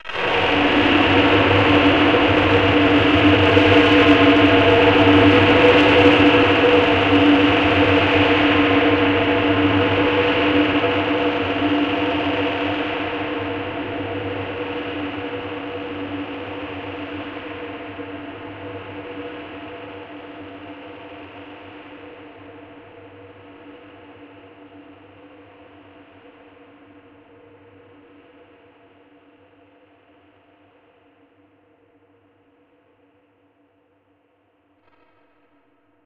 reverberated, filtered and distorted ambient droneI needed aggressive sounds, so I have experienced various types of distortion on sounds like basses, fx and drones. Just distorsions and screaming feedbacks, filter and reverbs in some cases.